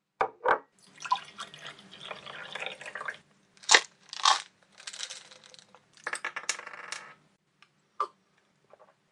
FALLOT Roxane 2018 2019 taking pills
To create this song I recorded several pieces of sounds that I mixed together. First I recorded myself opening a can of pills and some boxes, then I poured water in a glass and I recorded myself drinking some of it. I wanted this sound to suggest that someone was preparing his meds before taking them with some water. That’s why I kept the best parts, where we heard more easily what I wanted to express. I reduced the noise (around 12dB for the glass and the opening parts, a bit less with the drinking because the sound was a bit lower). I used the compressor effect (threshold : -20dB, noise level : -40dB, Ratio : 3.1, release : 1.8) because the part with the glass had too much peak and I normalized a tiny bit (10dB) in order to make the drinking part more audible.
Descriptif selon la typologie/morphologie de P.Schaeffer :
Cet objet sonore est à la fois une impulsion complexe X’ et une impulsion variée V’, ce son est un groupe nodal.
medicine, pill, meds, doctor, sick, ill, taking-pills, trash-can